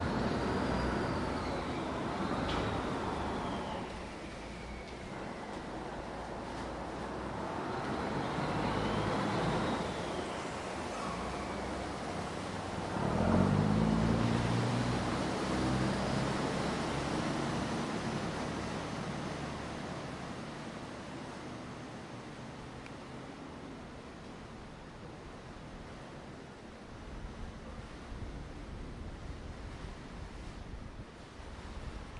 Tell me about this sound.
Asok Pier Boat
Zoom H1 Boat arrives at Asok Pier then leaves. Raod noise overhead from bridge traffic.